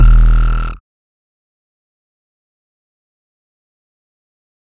- ALIEN KIT MODBD E 3
Here is my first drum kit pack with some alien/otherworldly bass drums. More sounds coming! Can use the samples wherever you like as long as I am credited!
Simply Sonic Studios
modular, synth, drum, kit